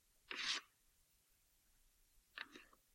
Container being picked up and put down.
foley, object, pick-up, put-down